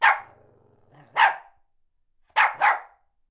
Dog Shih Tzu Bark x4
Shih Tzu dog, barking
Shih-Tzu, Animal, Bark, Barking, Dog